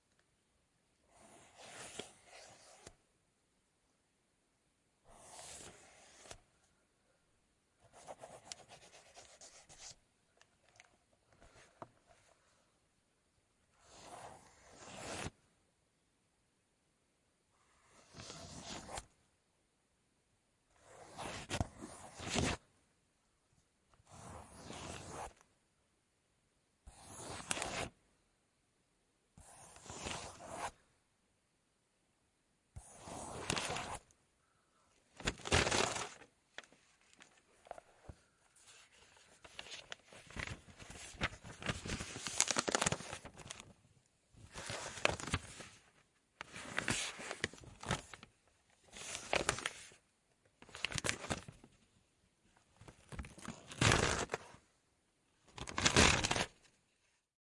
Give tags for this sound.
fiddle Paper pencil rip scratch scribble tear writing

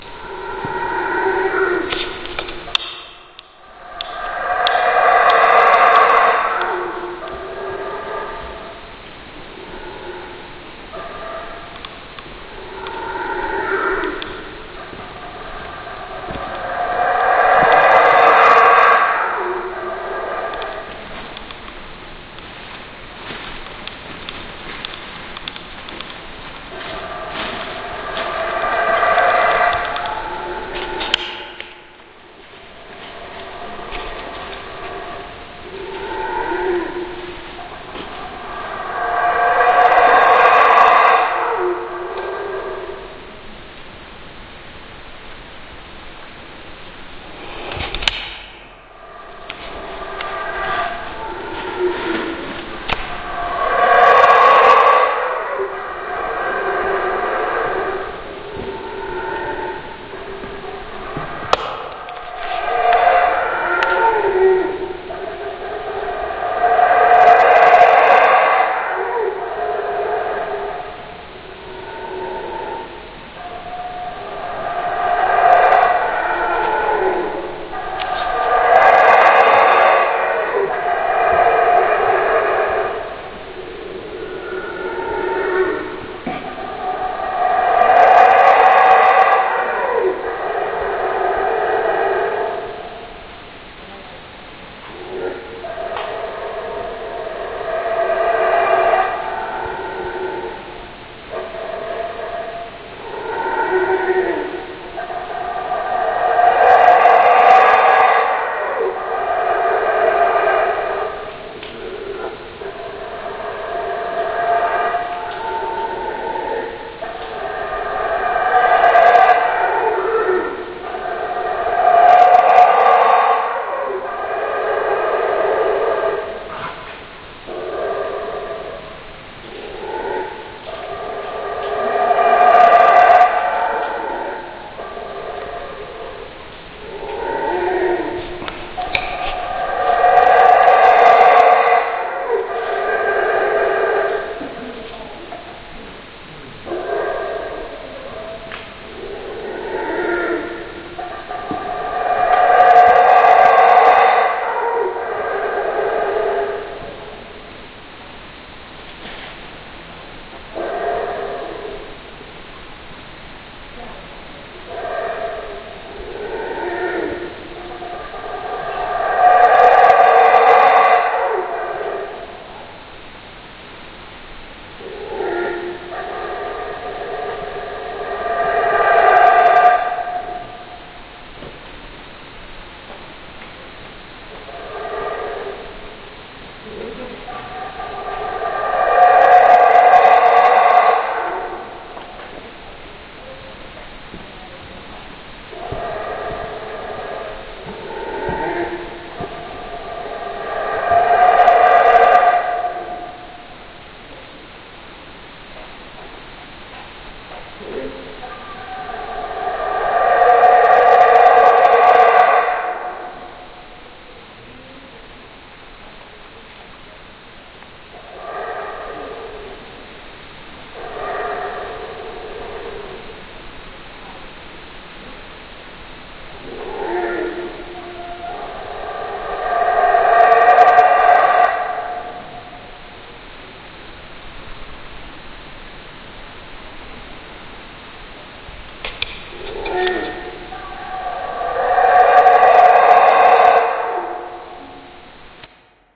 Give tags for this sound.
guatemala Howler monkey peten scream

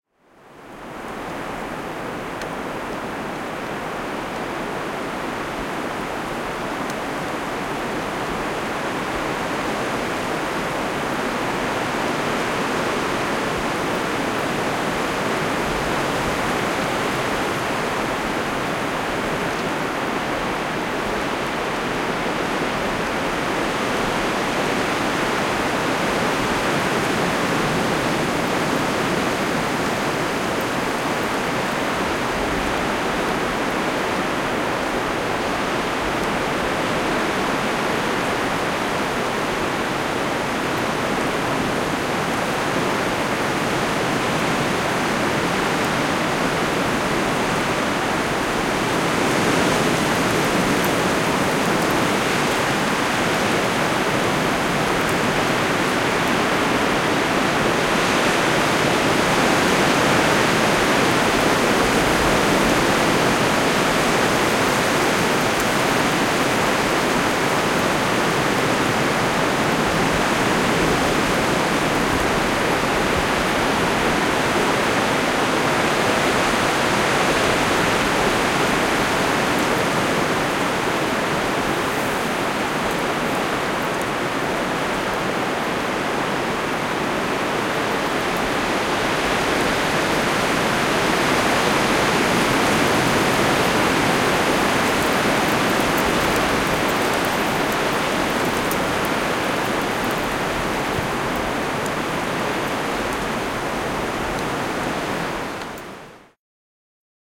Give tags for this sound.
Winter Tree Soundfx Rustle Storm Wind Field-Recording Puut

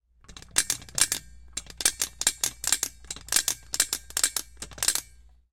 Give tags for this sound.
valves Trumpet mus152 water blow air

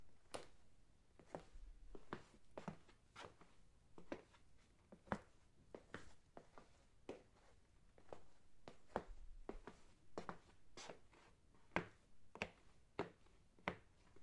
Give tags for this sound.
footstep movement steps wood

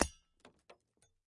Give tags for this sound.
ornament smash glass

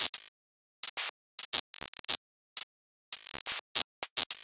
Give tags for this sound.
glitch noise

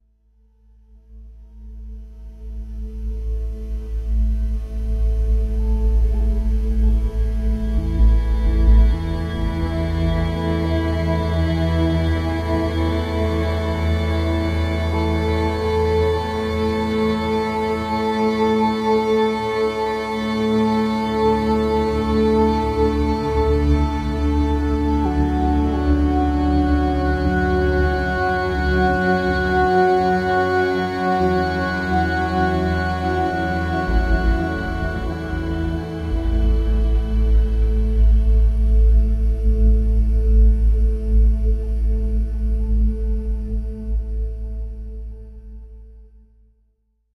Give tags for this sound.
harmonics descending bagpipe synthetic-atmospheres atmospheric bag-pipe

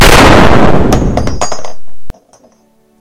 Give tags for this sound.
gun shot fire military weapon rifle shooting sniper projectile army firing

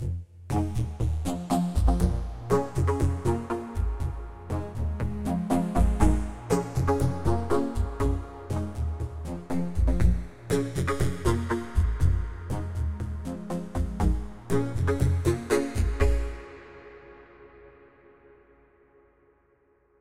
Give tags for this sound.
synth melody rythm

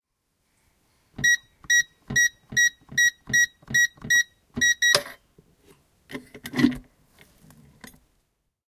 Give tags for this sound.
electronic-lock metal-box opening-safe safe

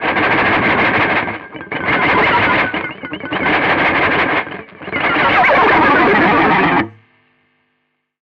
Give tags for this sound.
lo-fi distortion noise

